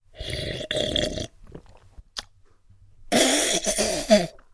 Man drinking coffee, then spits it back
spit, swallow, spitting, human, coffee, mouth, drink, man, gulp, drinking, sipping